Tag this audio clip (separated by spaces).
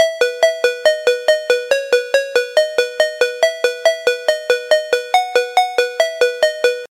05 3 alert cell cell-phone free happy jordan mills mojo-mills mojomills mono phone ring ring-alert ring-tone tone